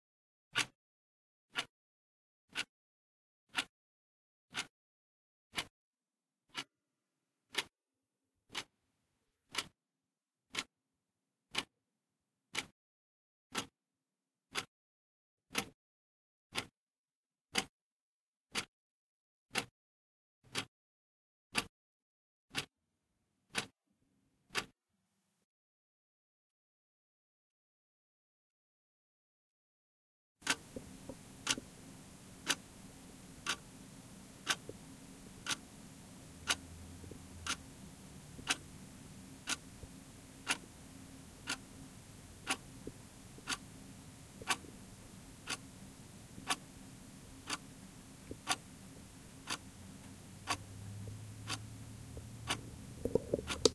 Ticking Clock 2
A quick recording of a tick from a clock in my house for foley. Recorded on the zoom H5 stereo mic. I cleaned up the audio and it is ready to be mixed into your work! enjoy!
field-recording, zoom-h5, h5, denoised, close, stereo, quality, ticking, foley, edited, clear, clean, clock, up, high, loud, zoom, sound, tick